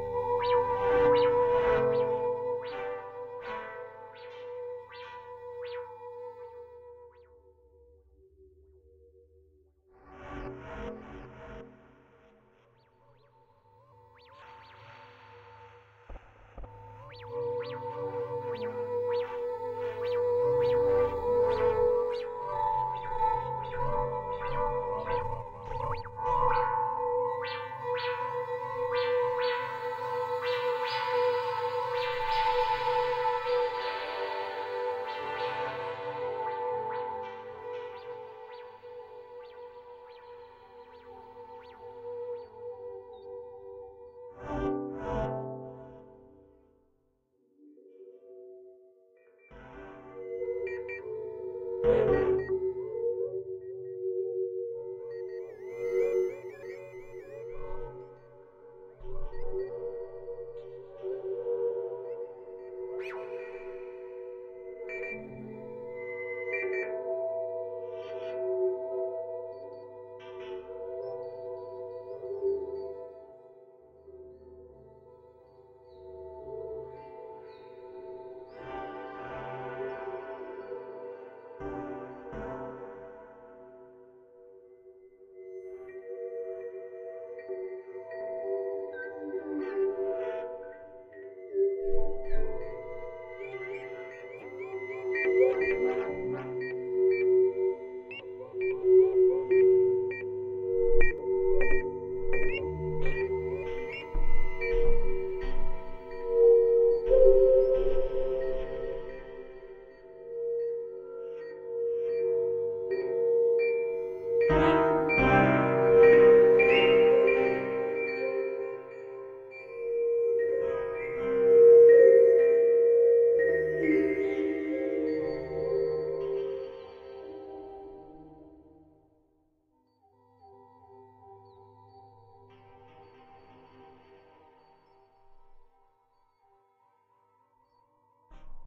dnb fx
This is a soundpattern which I created with reaktor.
abstract, digital, drumandbass, drumnbass, dub, effect, electric, experimental, fx, noise, sfx, sounddesign, strange